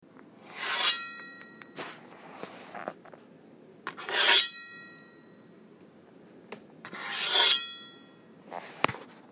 Heavy cleaver dragged across plastic cutting board
blade, metal, scabbard, swords, weapon